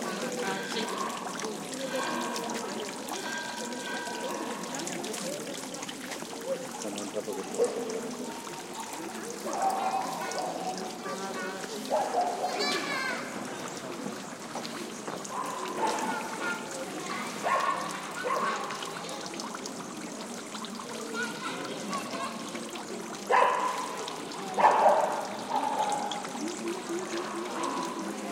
20110220 museum.exterior
ambiance at the entrance of the Cordoba (S Spain) Archeological Museum, with water falling, bells, people talking, and small dog barkings. Recorded with PCM M10 recorder internal mics